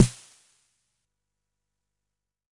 Snares from a Jomox Xbase09 recorded with a Millenia STT1